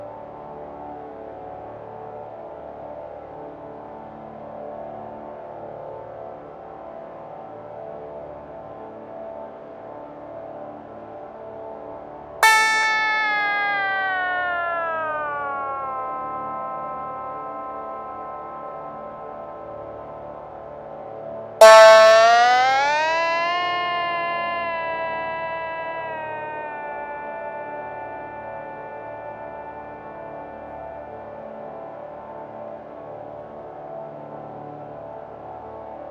GeoShred Drone and Sitar
Playing with the GeoShred app from moForte using the “Sitar+Sympathetic Drones” patch.
drone, GeoShred, sitar